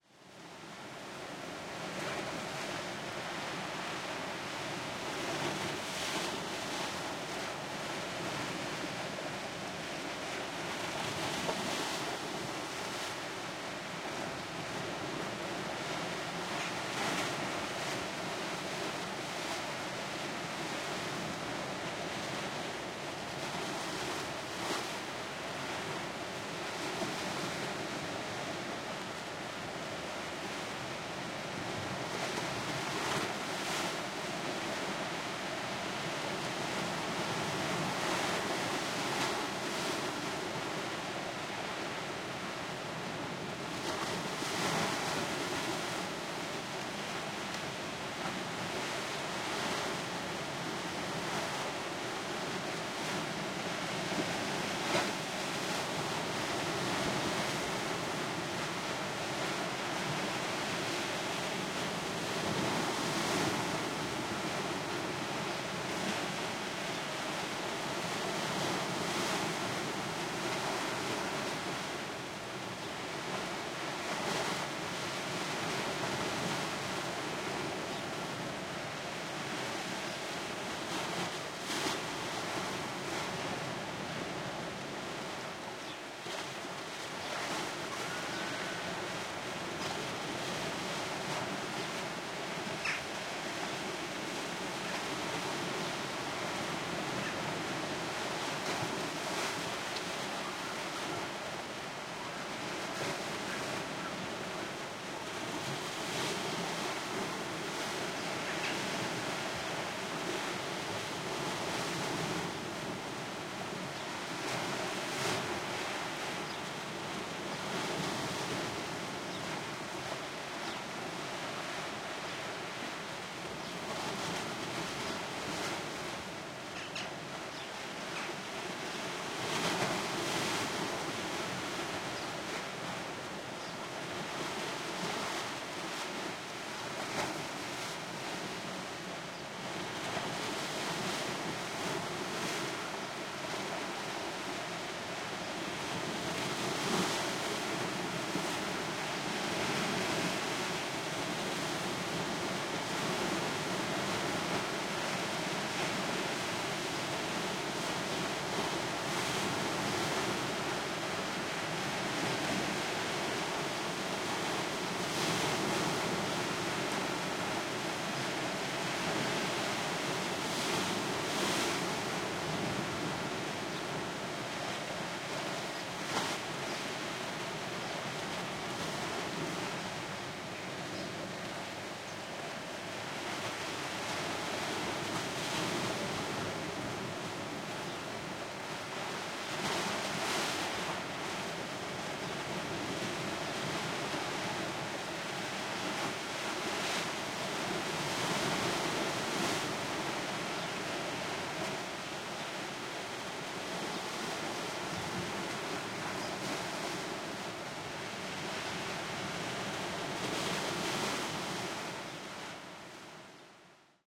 Waves, Mediterranean Sea, Rocky Beach, Crushing, Seagulls, Birds, Tourists, Powerful Waves, Rolling Rocks, Splashing, Distance 50m Above Beach, Aphrodites Rock, Cyprus, Zoom F8n, Usi Pro, 19224, 05
Recorded in Cyprus near Aphrodites Beach. The sound of the waves and the crushing waves are so very different because of the rolling rocks. After the waves crushing on the shore it creates a fantastic sound above the stones. Like a nice rolling.
beach, birds, coast, cyprus, field-recording, grinding, nature, ocean, rocks, rolling, sand, sea, seagulls, seaside, shore, stones, water, wave, waves